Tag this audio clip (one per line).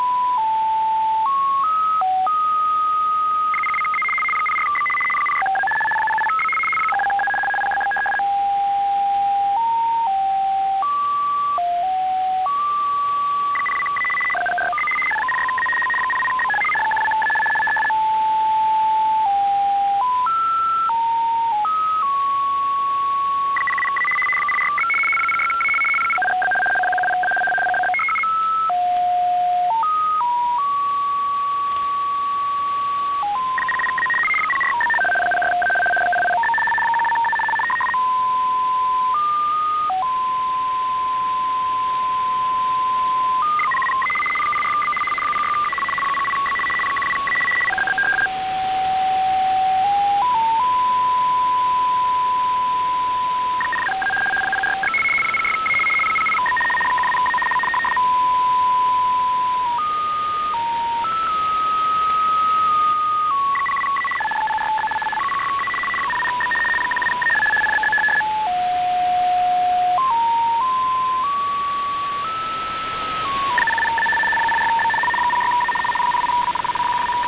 data
Shortwaveradio
WebSDR
transmission
signal
Shortwave
Softwaredefinedradio